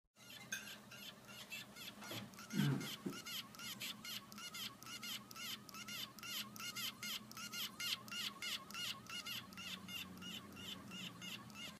Weird Bird
A bird I heard the other day. I don't know what it is.
bird, birds, birdsong, morning, tweet